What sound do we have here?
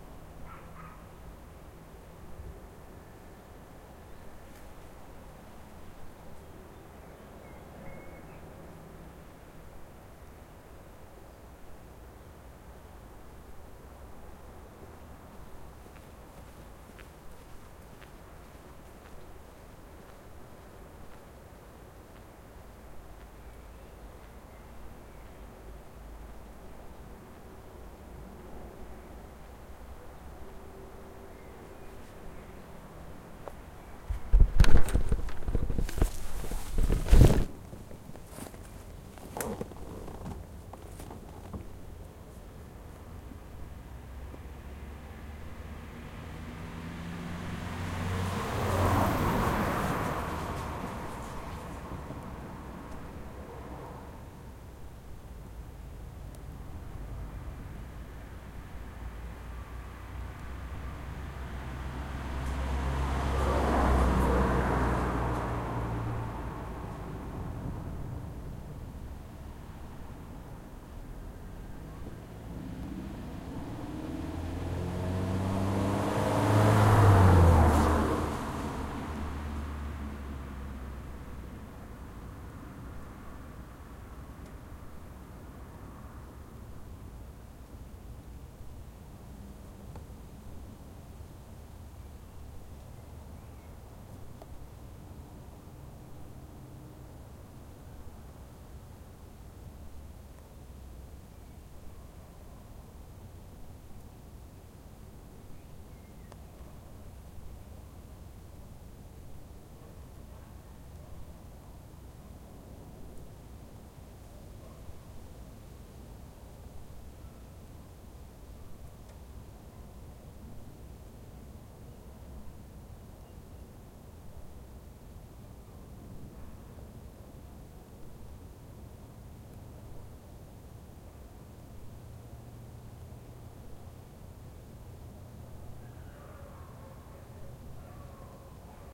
amb - cecebre 01
birds, forest, nature, woods